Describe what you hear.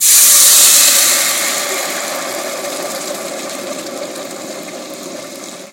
cooling down hot saucepan with water
Cooling down a hot metal saucepan with tap water. Sound recorded with the Mini Capsule Microphone attached to an iPhone.
cool, cracking, down, kitchen, metal, pouring, saucepan, water